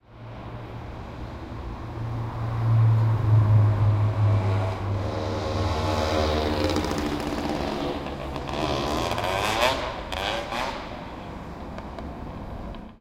This was about the only interesting sound to come out of a 30 min
recording of my walk into the city centre up Oxford Road in Manchester,
UK. Made using the internal stereo microphone on an Edirol R1.
city, manchester, motorbike, oxford-road